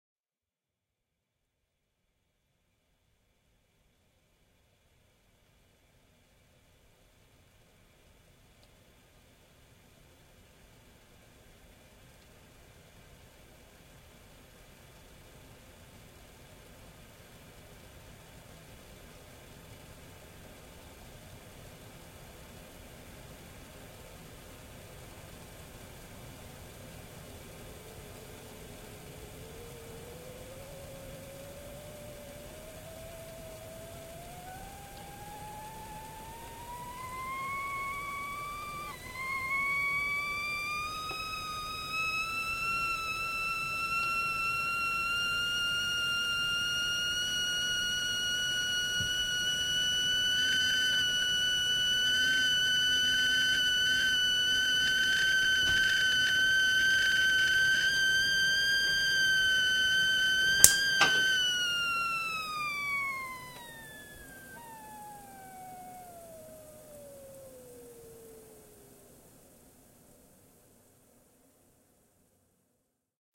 A kettle whistling on a stove.
boiling, kettle